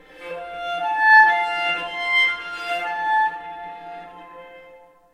viola overtones
viola processed overtones
overtones, transformation, viola